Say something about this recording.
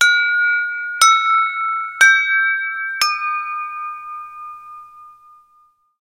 A pleasant alarm "ringtone" for use on Blackberrys, iPhones, or anything that takes sampled ringtones. Based on KGJones' beautiful Wine glass samples 5, 4, 3 and 2.